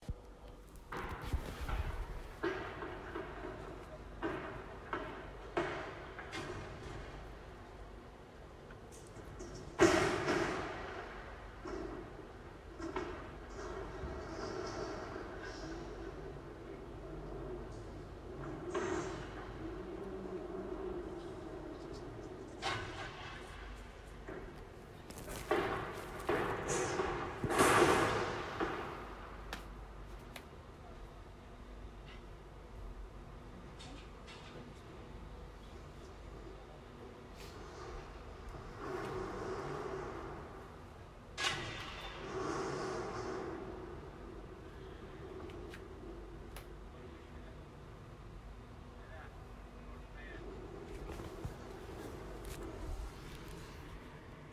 Construction site
constructing, construction, loud, noise